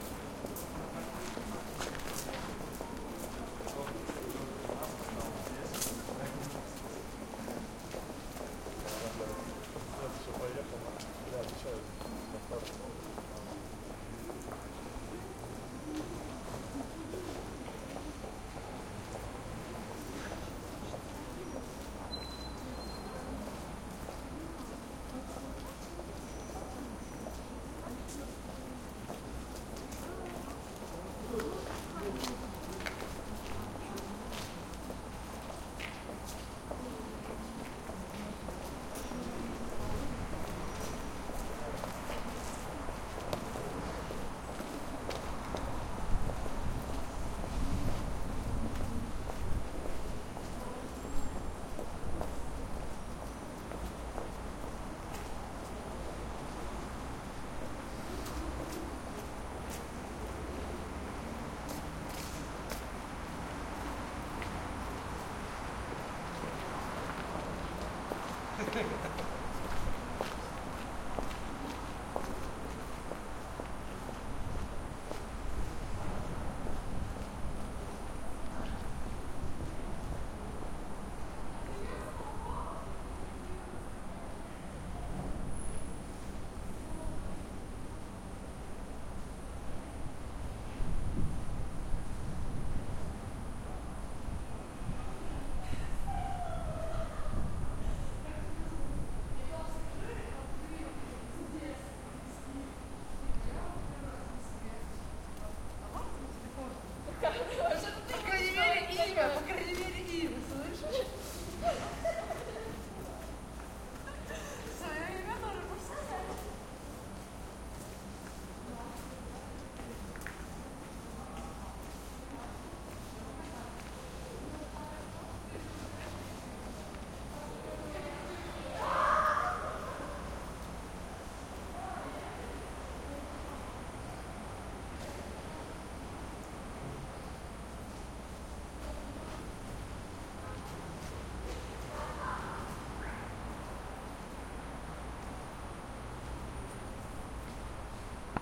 110224 00 center of Moscow winter footsteps
Winter evening on the street in the center of Moscow
Moscow, Russia, steps, street, Winter